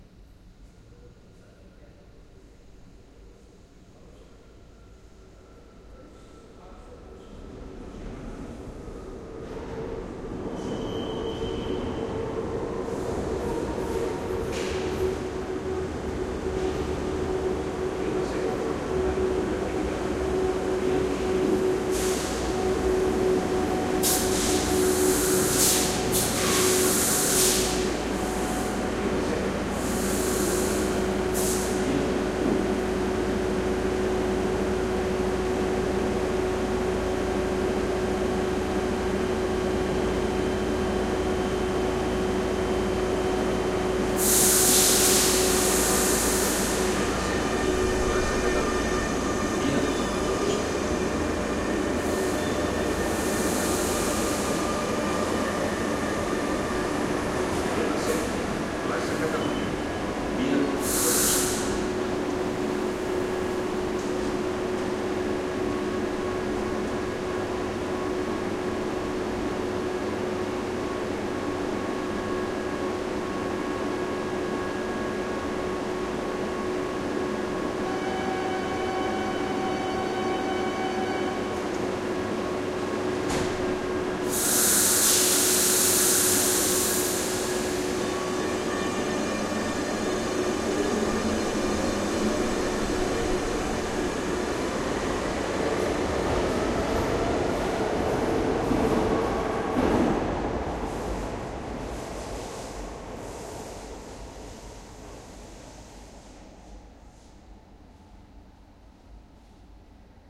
Llegada y partida de tren subterráneo
Underground train arrival and departure from station.
train, arrival, underground, departure, station